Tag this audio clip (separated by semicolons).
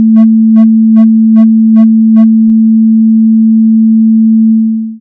fade; mono; wahwah